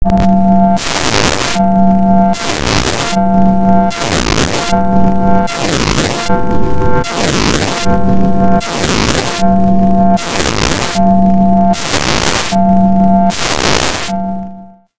Genetic programming of sound synthesis building blocks in ScalaCollider
scala-collider, synthetic